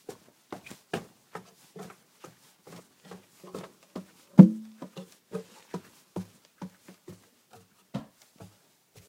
someone climbing a wood ladder up to a loft